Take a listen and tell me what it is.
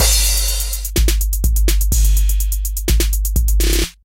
Loop created with 808 and 909 drum sounds in voyetra record producer. 125 beats per minute.